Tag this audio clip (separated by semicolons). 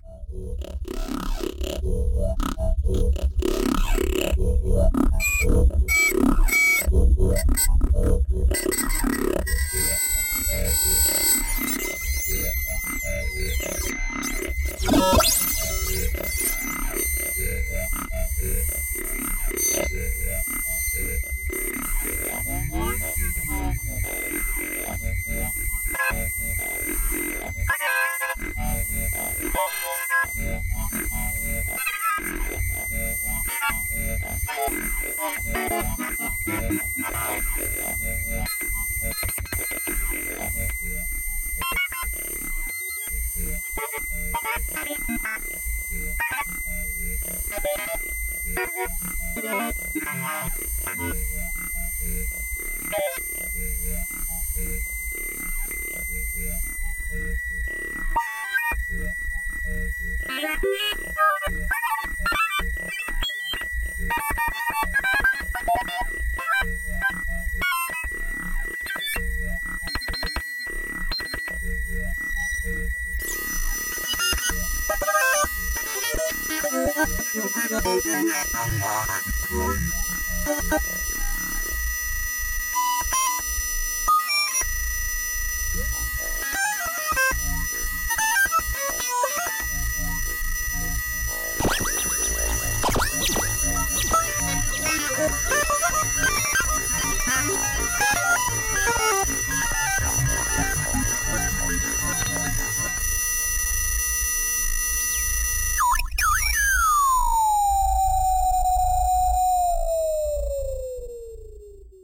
spaceship; signal; space; sci-fi; soundesign; commnication; computing; ufo; alien; radio; transmission; retro